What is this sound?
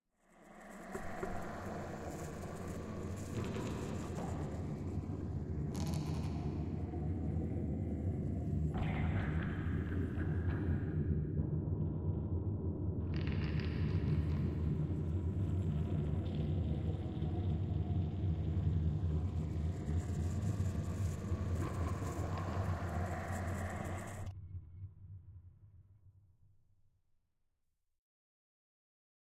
fidgetspiners ambience
fidgetspinner, rotative